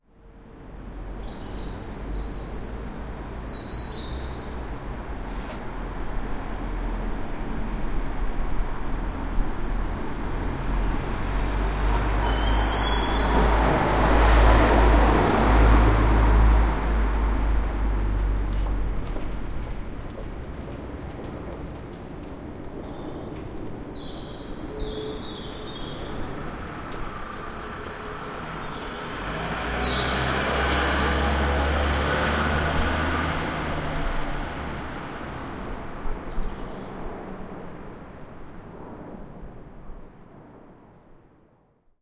Thirleby Road, London SW1
8th January 2012: Dusk on a Sunday evening in a quiet street near Westminster Cathedral
Victoria, Westminster, 080112, Thirleby-Road, SW1, birdsong, London, field-recording